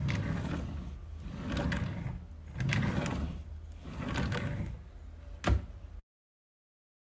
El sonido de un cajón al abrir y/o cerrar.
desing, field-recording, sound